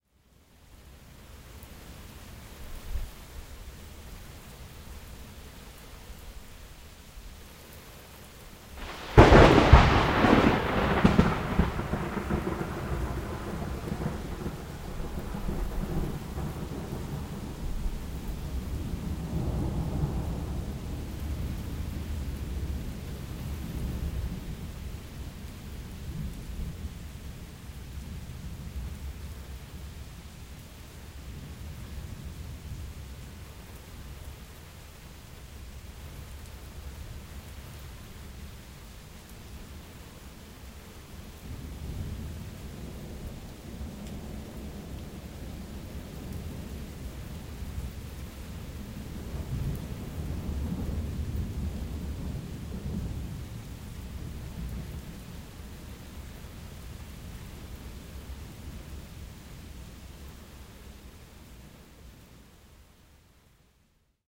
Nice crack of thunder - Recorded on June 18th 2006 in North Texas with Sony ECM-99 to Sony MD
field-recording, rain, thunder, thunderstorm